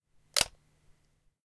Camera Flash, Lift, C
Raw audio of lifting up the built-in flash light on a Nikon D3300 camera.
An example of how you might credit is by putting this in the description/credits:
The sound was recorded using a "H1 Zoom V2 recorder" on 17th September 2016.
d3300, camera, raised, lift, lifted, flash, nikon